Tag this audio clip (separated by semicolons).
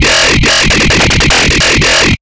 sound; synth; Dubstep; drumandbass; electronic; bass; music; growl